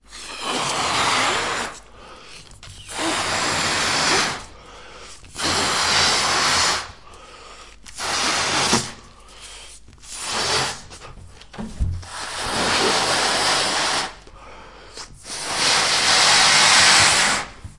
Blowing Another Balloon
Blowing up balloons is hard work but it pays off with sounds.